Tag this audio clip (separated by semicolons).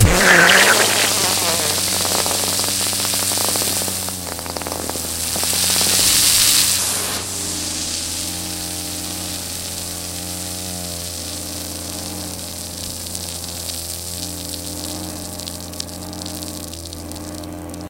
liquid; toilet; impact; bathroom; fart; poop; shit; poo; sick